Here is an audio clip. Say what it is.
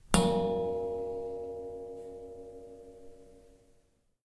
A gong bell sound.
bong, bell, clanging, clang, metalic, metal, steel, gong